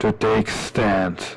This song called BaReBass SUB2 is about 2 friends who will get married soon. In that occasion, I wanted to created something loungy with a magical kind of text.
The title is created from the two lovebird-names and the track will be included on the album (Q2-2011) "Subbass Terrorist".
Clean-cut samples!
Enjoy and please give some feedback when you like! Thanks!